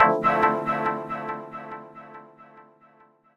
ASBS - House Chord 001
stab, ASBS, chord, samples, sound, house